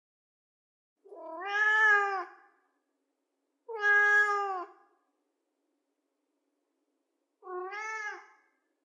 Cat Annoyed Meow / Wail
A cat's annoyed meow. (Note: I didn't harm the cat in any way to get her to produce this sound. She just gets annoyed when people are around.)
cry, angry, wail, wailing, cat, animal, kitten, annoy, meow, miau, sad